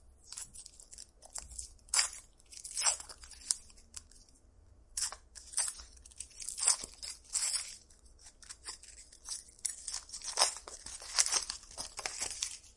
Tearing metallic paper when opening package - Rasgando papel metalizado ao abrir o pacote
Tearing metallic paper when opening a package. The audio was extracted from a product unboxing
open, Tearing, unpacking, opening, paper